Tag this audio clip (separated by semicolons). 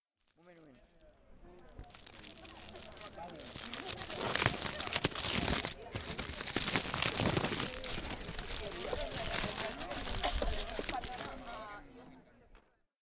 campus-upf; UPF-CS13; tin-foil